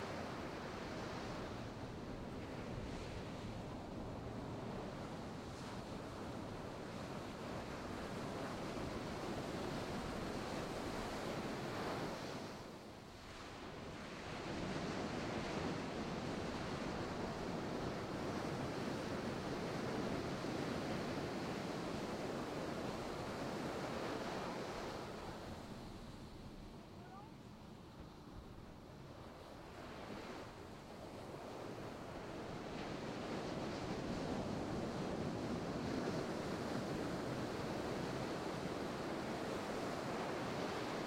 Breaking Waves
Waves breaking on a Malibu Beach. Recorded with a shotgun mic, mono track.
Field-Recording, Ocean-sounds, surf